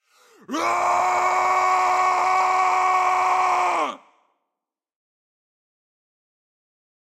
Kingly Yell - WITH reverb
Warrior aggressively yelling with added reverb.
Taken from my Viking audio drama: Where the Thunder Strikes
Check that story out here:
Never stop pluggin', am I right? haha.
I hope you this will be useful for you. Cheers~